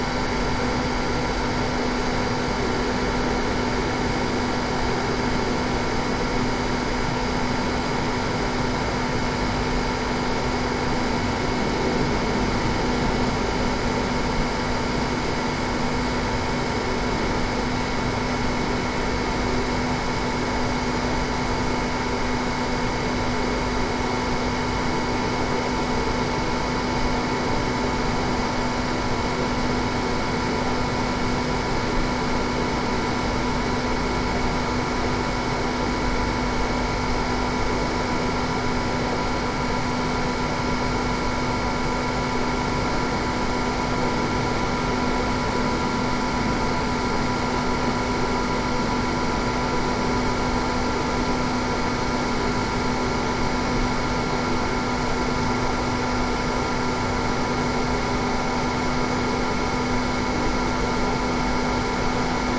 fan & cooling system sounds 001
sound from industry 2013
fan, cooling-system, drone, noise, field-recording, ambient, environment